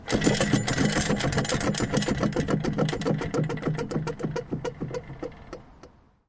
Ruler creak.
Drawing a metall ruler on a table with metallstuff.
ambiance, creak, field-recording, machine, mekanisk, soundscape